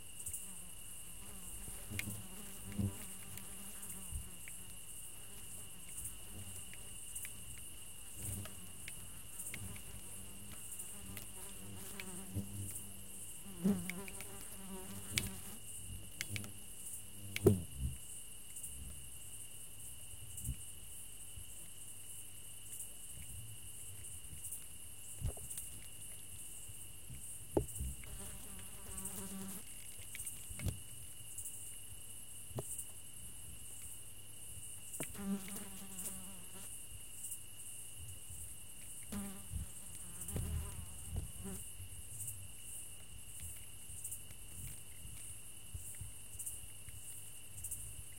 Ambience countryside night insect buzz
Ambience, countryside, field-recording, insect, insects, nature, night